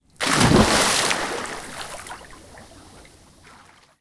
Splash, Jumping, E
Raw audio of someone jumping into a swimming pool. Browse the pack for more variations.
An example of how you might credit is by putting this in the description/credits:
The sound was recorded using a "H1 Zoom recorder" on 28th July 2016.
jump, jumping, pool, splash, splashing, splosh, swimming